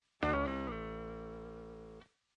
video game death
simple game sfx recorded using eletric guitar
cartoon, retro, song, soundtrack, spaceship, video-game